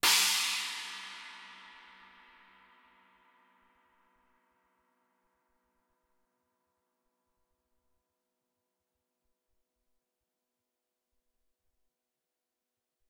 20" Masterwork china recorded with h4n as overhead and a homemade kick mic.
china, cymbal, h4n